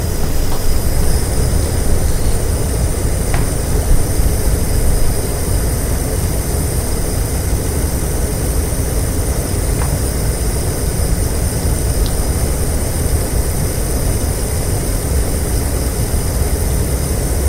Gas Stove Gas 3
Gas stove clicking fire burner
gas, burner, clicking, stove, fire